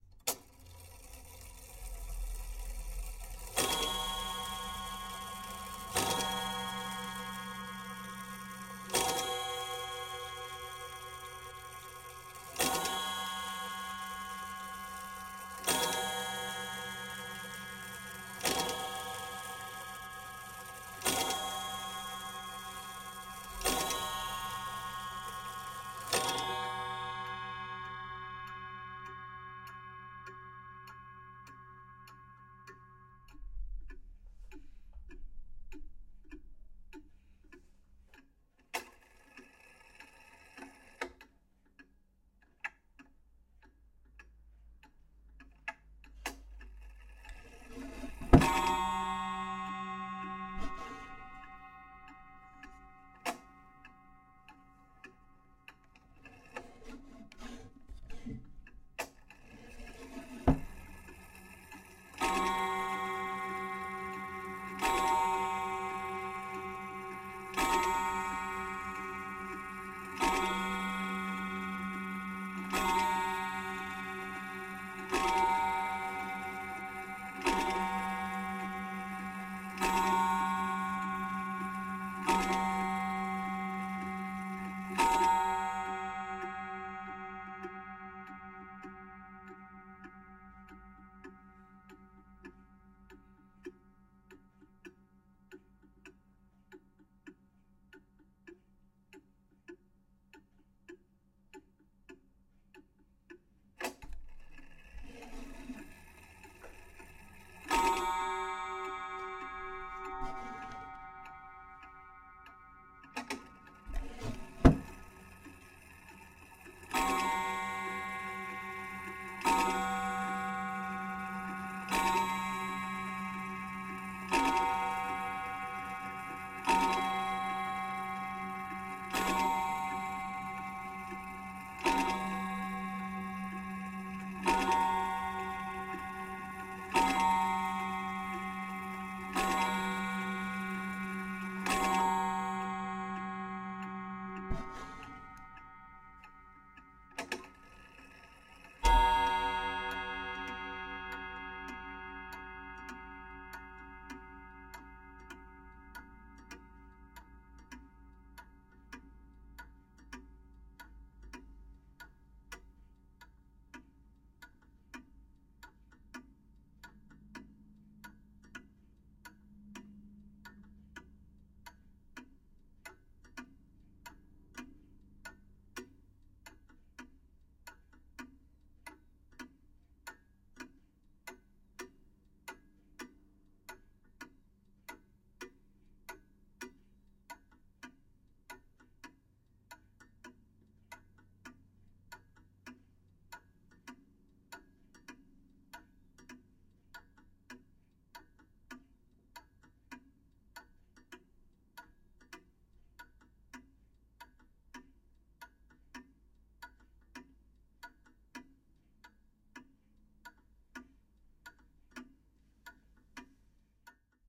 wall clock chiming and ticking
a ticking wall clock chimes 9 times, 10 times and 11 times. including the ticking noise. the clock is old, so maybe the bell isn't that perfect, than newer ones.
bell, clock, tick